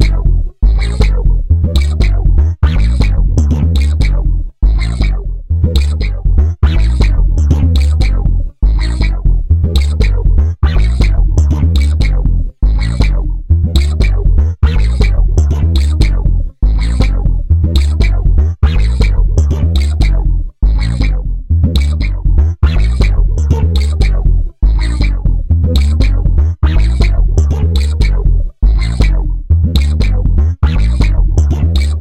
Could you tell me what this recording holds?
Bass Loop at 105 bpm created with Spectrasonics Stylus RMX. Recorded in Ableton Live 8.2.1.
105-bpm, bass